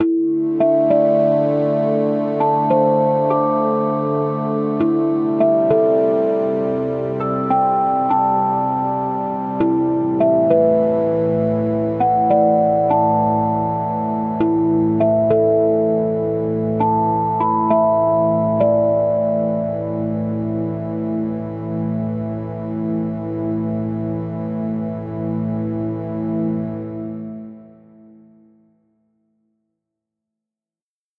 A short sci-fi motive inspired by the beauty of the Universe!
The 2.0 version of this track (mastered and added some additional effects for more lush and deep sound) can be found in the "night across the stars (2 versions)" pack.